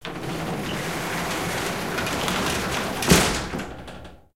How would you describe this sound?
Garagedeur-Sluiten3
Sluiten van een Garagedeur. Closing a garage door
closing, deur, door, garage, sluiten